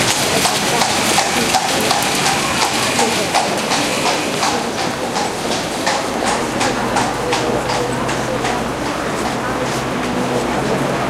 short take of street ambiance in Gent (Belgium), with horse carriage passing and traffic noise. OLympus LS10 internal mics